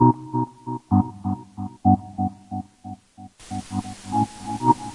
drift loop
lite rhythm pad created with analogue hardware
drift, loop, pad, pulse